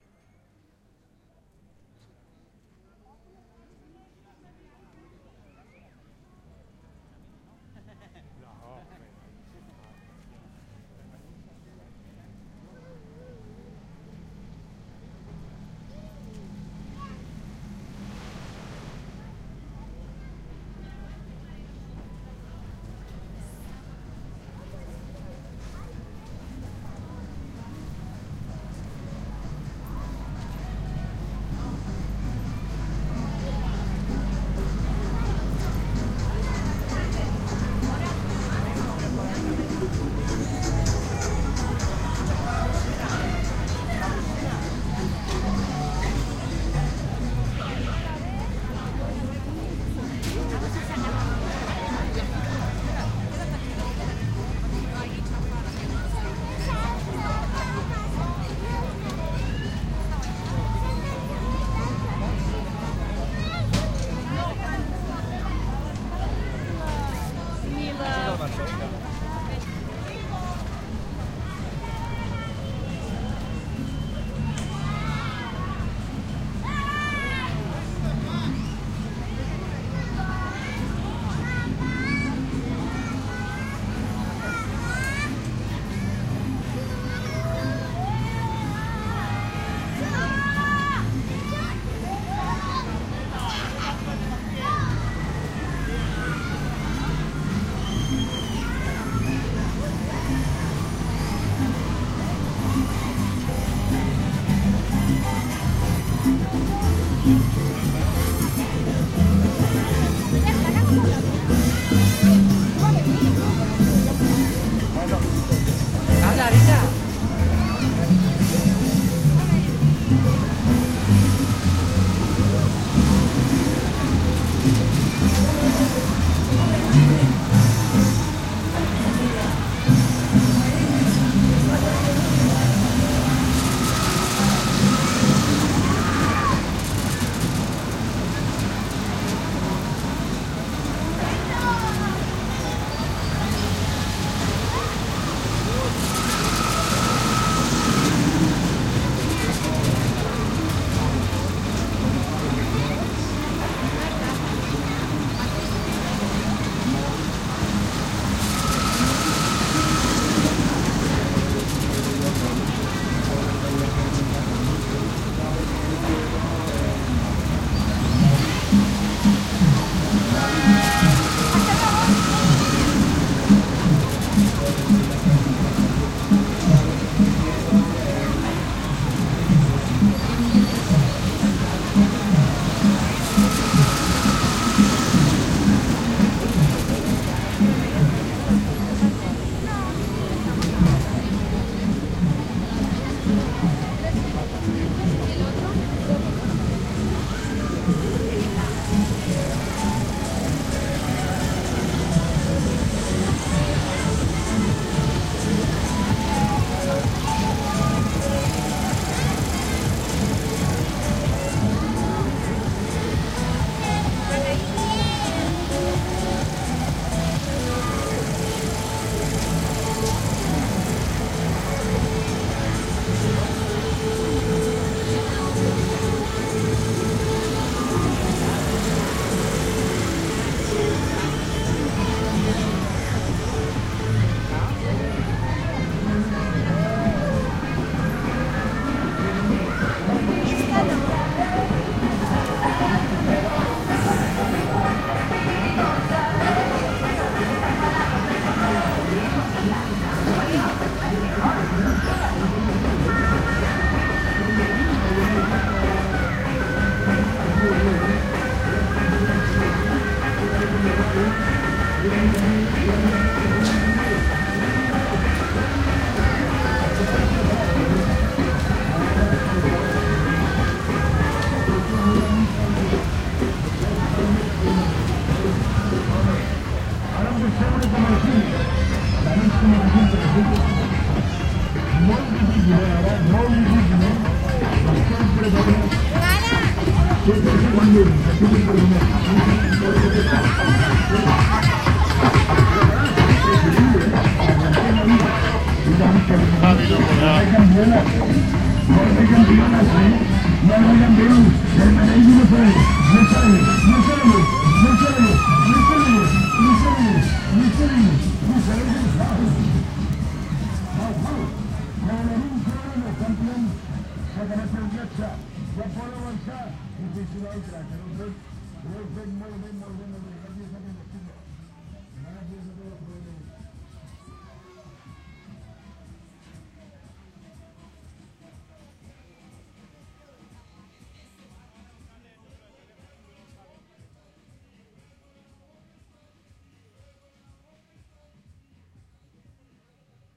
diversas atracciones feria
A number of child amusement rides at the nomadic fair during the main festivities of Sant Andreu district in Barcelona. Zoom H2.
amusement-park, crowd, fair, fun, machine, music, party, people, ride, sonsstandreu